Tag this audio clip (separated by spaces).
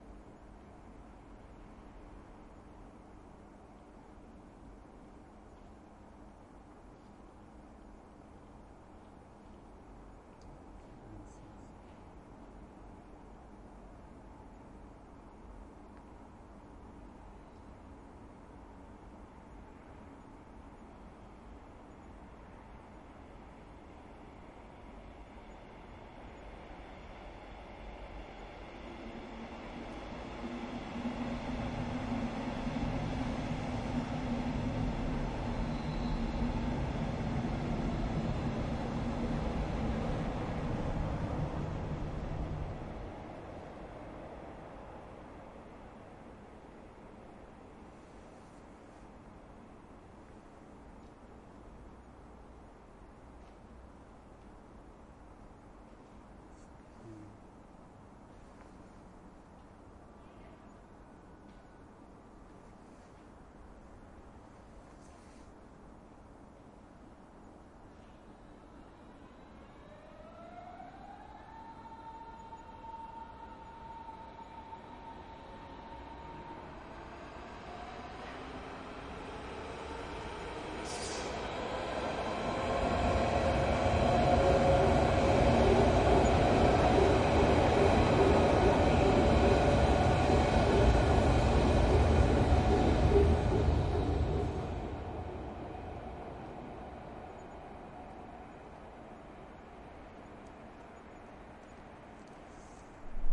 Berlin bridge rail rail-road rail-way railway rumble rumbling S-Bahn train trains vibrations